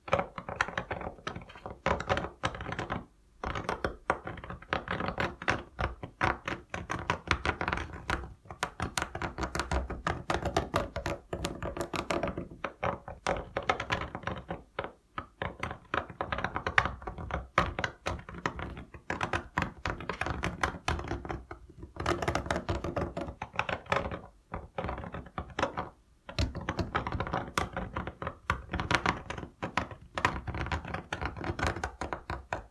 Scratching with Fingernails
Scratching fingernails on a solid wooden surface.
I'd love to hear it in action.
fingernail, scratch, scratching, wood